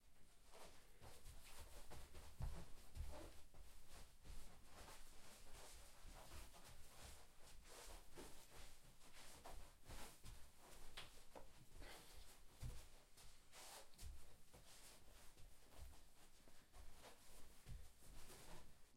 Two men struggling with each other silently, one blocking the other from passing. Indoors - shoes on carpet.
carpet, block